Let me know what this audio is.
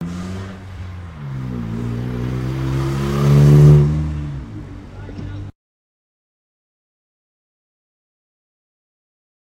small car driving fast
ambient, driving, field-recording